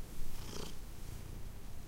osc - purrub
oscar the cat, rubbing and purring.